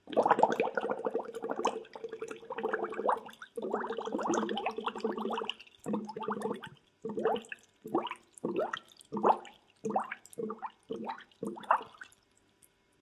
Water Bubbles 03
bubbles, splashing, straw